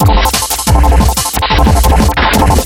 A destructed vocoded beat @ 180bpm
beat, bubbles, drum, fx, vocoder